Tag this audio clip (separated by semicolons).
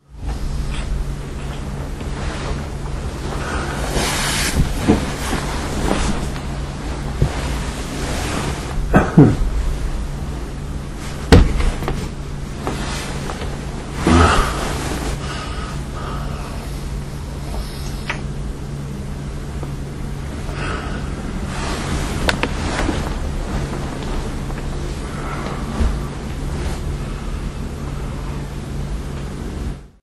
bed; body; breath; field-recording; household; human; lofi; nature; noise